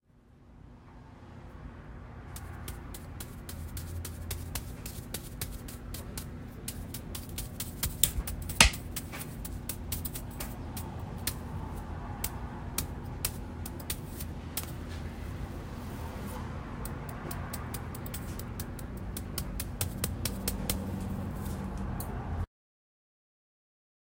Barber hair brushing.